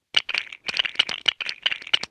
One of several classic Lego star wars sounds that i recreated based on the originals. It was interesting...legos didn't really make the right sounds so I used mega blocks.